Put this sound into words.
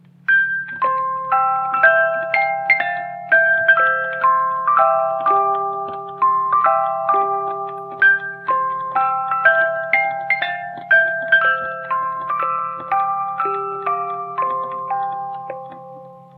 music box playing Hamborger Veermaster (Hamburger Viermaster), an adaption of english Shanty "The Banks Of Sacramento", instrumental, maritime
maritime, Sacramento, Hamburg, music-box, fun, Veermaster, Viermaster, Shanty, Hamborg